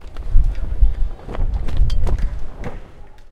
Opening umbrella (bar)
Opening of a big umbrella outside the bar. Also we can hear the wind moving the umbrella.